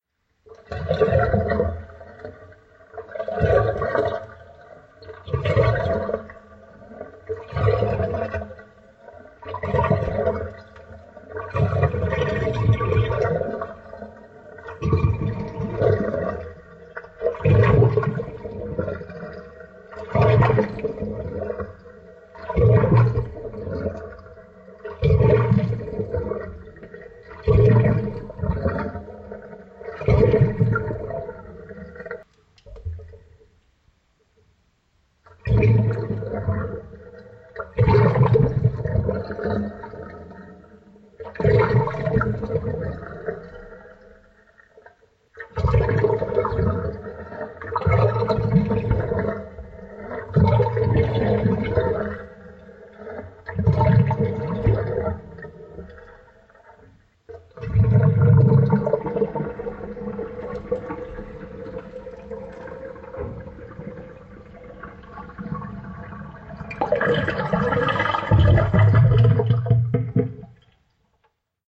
Monster Growl 2 (Water SFX remixed)
Took the part of the recording where I was just playing around with the plug in the sink and slowed it down to get this agitated monstrous growl. Enjoy!
Monster, water, Growl